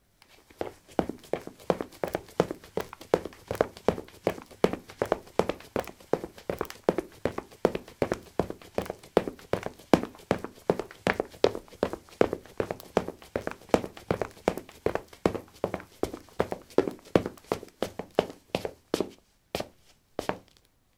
lino 16c trekkingshoes run
Running on linoleum: trekking shoes. Recorded with a ZOOM H2 in a basement of a house, normalized with Audacity.
footstep, footsteps, run, running, step, steps